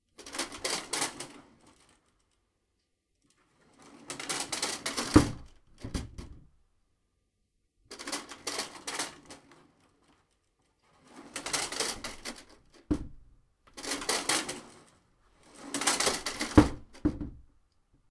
An old interior sliding door on rollers. Opening and shutting slow, fast, and slamming.
slam, sliding-door, ADPP